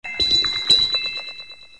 Science Bells
A sound clip I made with a few different instruments clanging together at once.
Could be used to represent some form of strange light in a film scene or
a set of bells.
Space, Strange